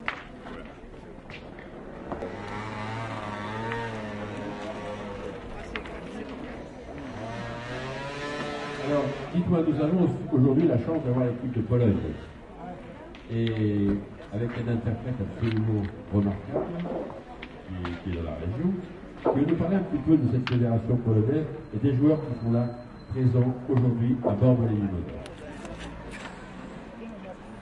h907 boules pologna 2
The sound of competitive boules during the French National Championships 2007. Includes the sounds of boules hitting each other and the backboard, voices of the players, as well as the announcer. The visiting Polish team is welcomed.